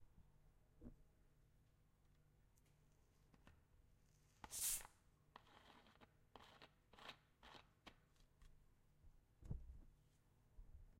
The sound of soda being opened